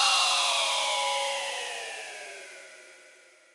Giving up the ghost metallic spectrum
bandpass, gforce, softsynth, percussion, imposcar